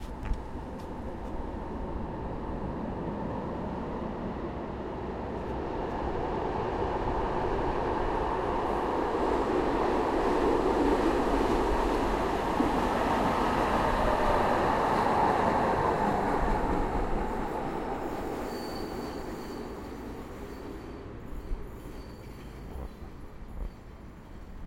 Tram Budapest Stopping
H1 Zoom. Tram passing by - and general traffic noise